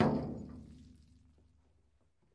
Tank of fuel oil, recorded in a castle basement in south of France by a PCM D100 Sony
fuel, oil, Tank